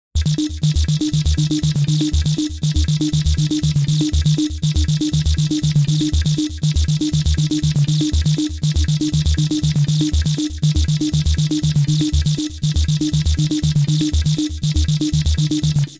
Made on a Waldorf Q rack